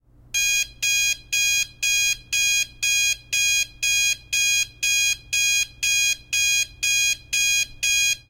An Alarm Clock Sounding. Wake Up!